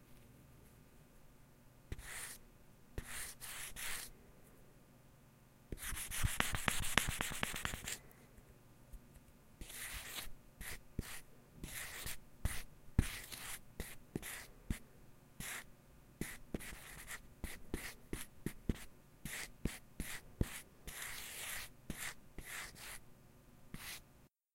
Whiteboard marker writing
dry erase marker writing on board. Recorded with zoom h4n
whiteboard-marker, scribbling, magic-marker, dry-erase, writing